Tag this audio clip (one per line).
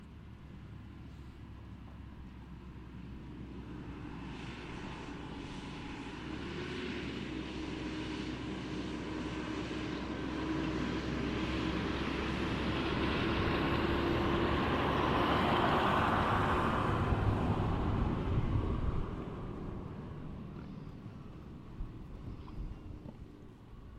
ambience
atmos
countryside
english-countryside
field-recording
tractor-close
tractor-driveby
tractor-driving
tractor-passing
tractor-sfx
tractor-sound